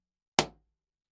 Balloon Burst Pop 3
Recorded as part of a collection of sounds created by manipulating a balloon.
Balloon, Bang, Burst, Pop